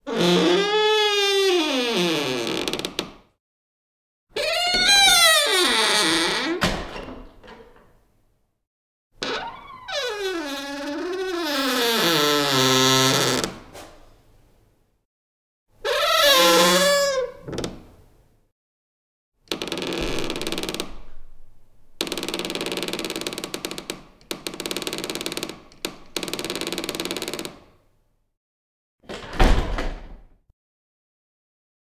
Old Door
Sounds from an old creaky door.
closing, creak, creaking, creaky, door, hinge, hinges, squeak, squeaking, squeaky